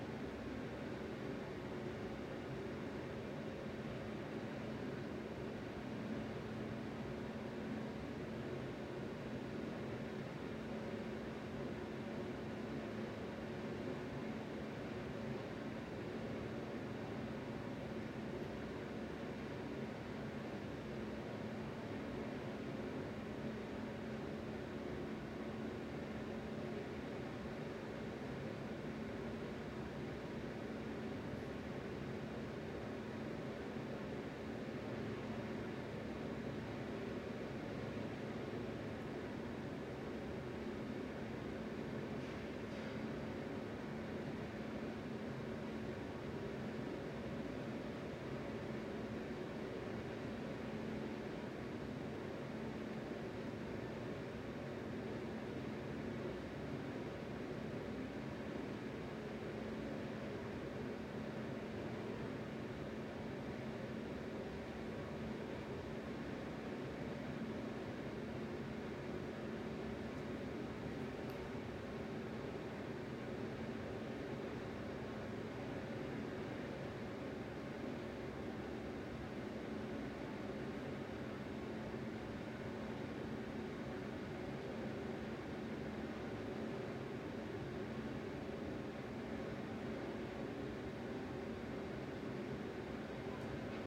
Elevador Ambience
Elevator, hall, room-noise, room-tone, roomtone